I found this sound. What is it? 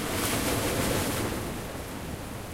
Wave Mallorca 13 IBSP2
16 selections from field recordings of waves captured on Mallorca March 2013.
Recorded with the built-in mics on a zoom h4n.
post processed for ideal results.
mallorca, mediterranean, nature, water, waves